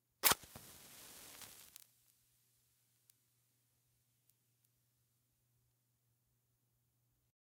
match strike 05
Striking or lighting a match!
Lighting a match very close to a microphone in a quiet place for good sound isolation and detail. One in a series, each match sounds a bit different and each is held to the mic until they burn out.
Recorded with a Sennheiser MKH8060 mic into a modified Marantz PMD661.
candle, cigarette, fire, foley, light, match, matchbox, smoke, strike